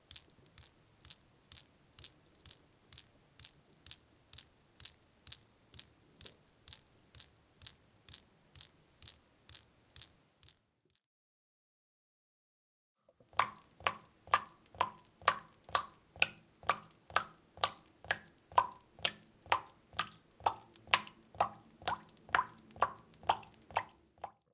03 Dripping Water
Water dripping - 2 variations
Bathroom, CZ, Czech, Dripping, Panska, Tap, Water